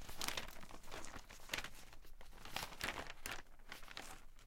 newspaper rustle
newspaper general rustle, Neumann U-87, ProTools HD
newspaper, pages, turn